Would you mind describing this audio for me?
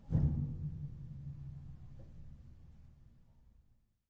Pedal 06-16bit
piano, ambience, pedal, hammer, keys, pedal-press, bench, piano-bench, noise, background, creaks, stereo
noise, background